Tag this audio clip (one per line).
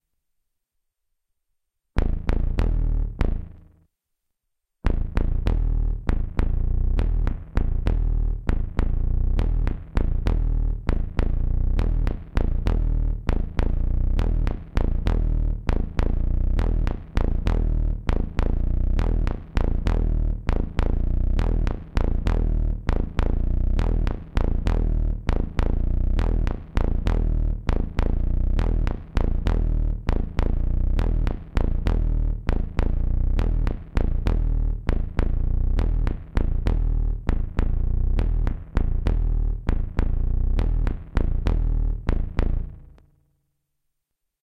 android
automation
conveyor
droid
interface
machine
Marche
music
opz
robot
robotic
transporter